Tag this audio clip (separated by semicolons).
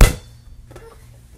impulse; response; convolution